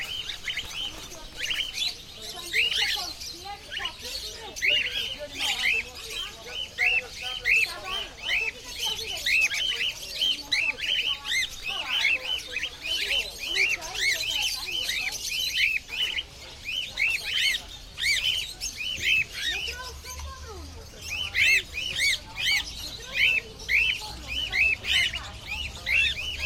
porto birdmarket 23
Porto, Portugal, 19.July 2009, Torre dos Clerigos: Tropical birds in cages on a birdmarket. Some high pitched birds in foreground, other birds and human voices in the background.
athmosphere, birdmarket, birds, city, field-recording, morning, porto, smc2009, tropical